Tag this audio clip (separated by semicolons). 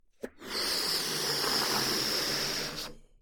Plane; Machine